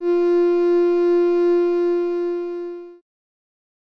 A synthesized cello sound created through AudioSauna. I'm not sure I'll ever find a use for it, so maybe you will. No claims on realism; that is in the eye of the beholder. This is the note C sharp in octave 4.